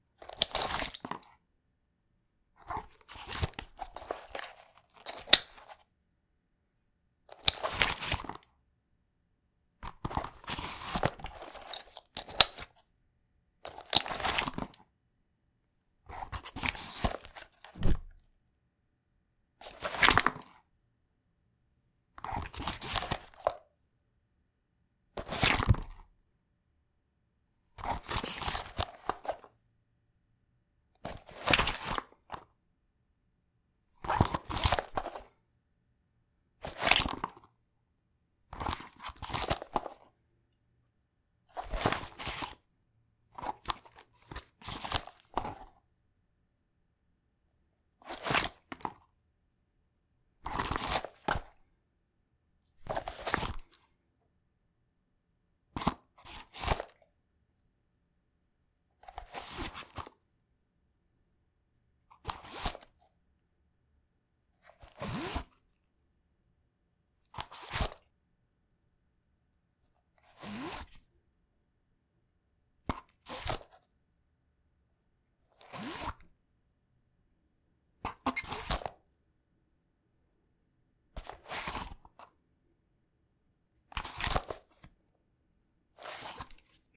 Taurus G2c Fabric Holster
Holstering my 9mm Taurus G2c in a Crosman fabric holster fastened with plastic buckle. Varied speeds. With and without unbuckle clicks. Multi-Purpose. Recorded indoors using a Blue-Yeti microphone. Cleaned in Audacity.
G2C, backpack, pistol, velcro, fabric, glock, drop, holster, belt, slide, equipment, zip, click, firearm, Taurus, gun, inventory, weapon, pick-up, bag, unzip, buckle, inspect